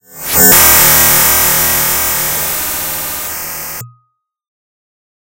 loud and abrasive crashing sound